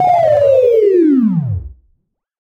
Attack Zound-10
Similar to "Attack Zound-01" but with a longer decay. This sound was created using the Waldorf Attack VSTi within Cubase SX.